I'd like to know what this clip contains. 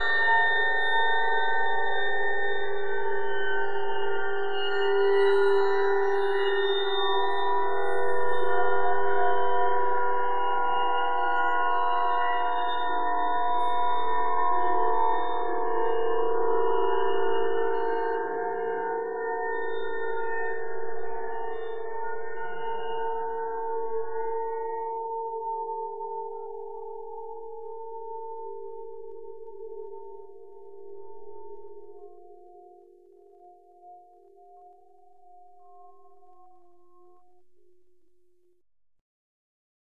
A friend was travelling, stayed over, and brought a battered clarinet (they play saxophone usually)- I sampled, separated a few overtones, and put them back together.